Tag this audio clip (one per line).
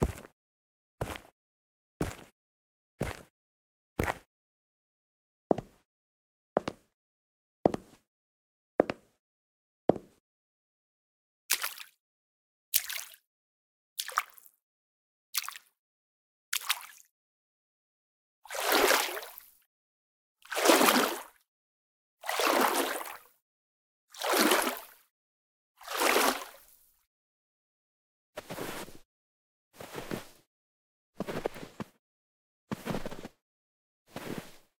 Footstep
Footsteps
dirty
feet
field-recording
foot
game
ground
snow
step
tile
video
walk
water